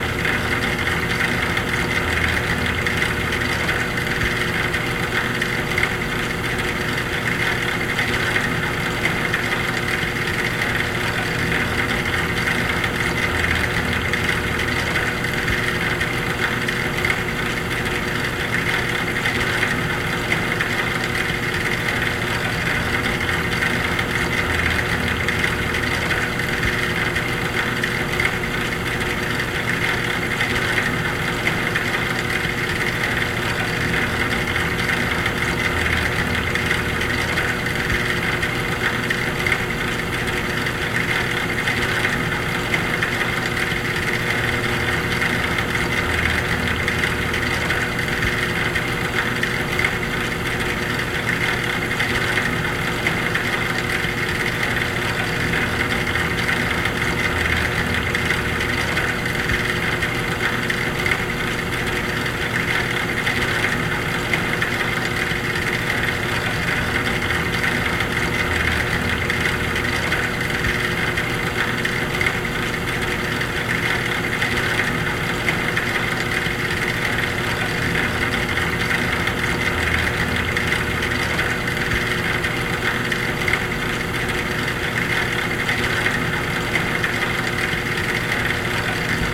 Motor on Boiler.
This is the sound of a small motor (water pump) on a boiler. Recorded in stereo with a Sony PCM-D100.